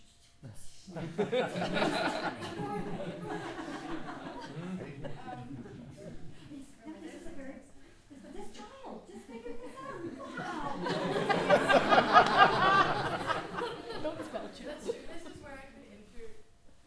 small group laugh 7
A group of about twenty people laughing during a presentation.Recorded from behind the audience using the Zoom H4 on-board microphones.